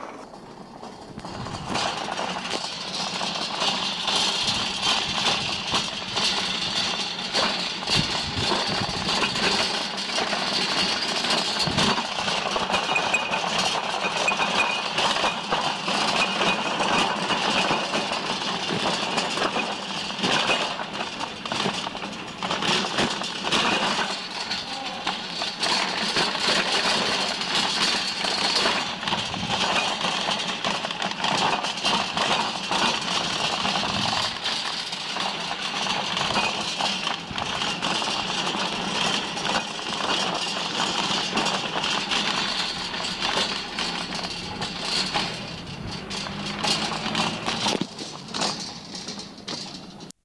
Trolley empties slower
Walking along an uneven Pavement with a Metal Shopping Trolley containing Bottles and Cans for re.cycling.
Bottles, Cans, Noisy